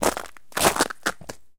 Walking on a pile of ice cubes while wearing mud boots.